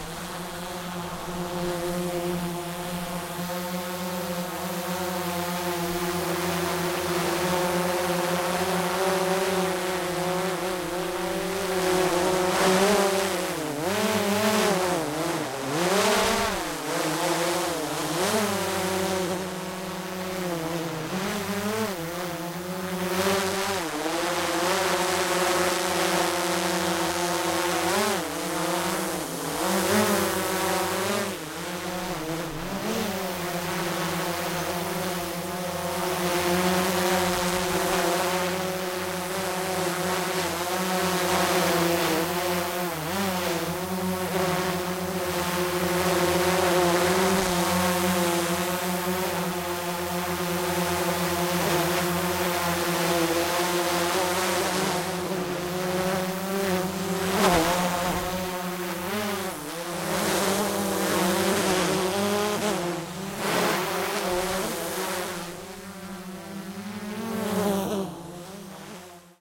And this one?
drone DJI
DJI quadrotore drone flying with variations around the microphone.
Recorded with Schoeps MS, reduced to LR stereo
recorded on Sounddevice 633
France, 2021
DJI, drone, field-recording